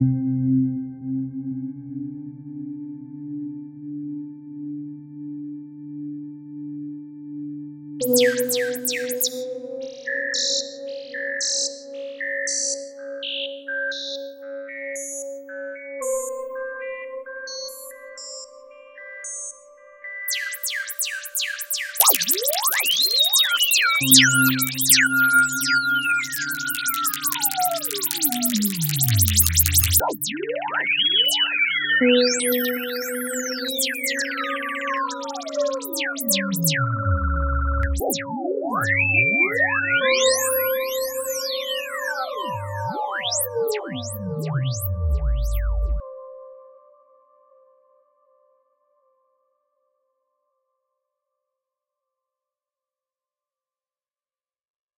microKorg and Garageband VST.
birdsong, jungle, synthetic-atmospheres